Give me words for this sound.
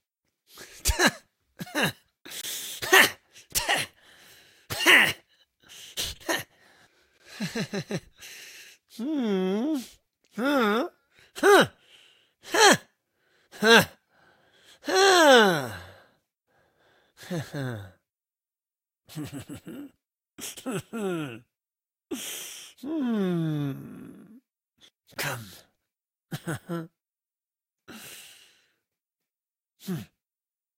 AS012949 excitement
voice of user AS012949
provocation, male, incitation, voice, wordless, instigation, incitement, human, man, excitement, vocal